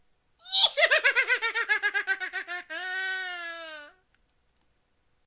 After making that mash up with analogchills scream i got bored and well decided to make a evil laughs pack. Seeing as the evil laughs department here is a touch to small.